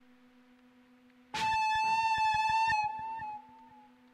Dark robotic sample 020
Sample taken from Volca FM->Guitar Amp.
artificial, dark, fm, robotic, sample, volca